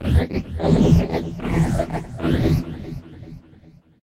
THE REAL VIRUS 11 - VOCOLOOPY - E1
A rhythmic loop with vocal synth artifacts. All done on my Virus TI. Sequencing done within Cubase 5, audio editing within Wavelab 6.
loop, multisample, vocal, vocoded